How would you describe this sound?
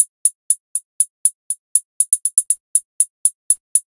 hi hat loop
hat, hi